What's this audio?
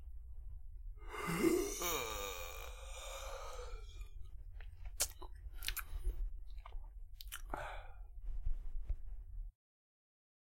One Yawn
Used for male waking up or expressing tiredness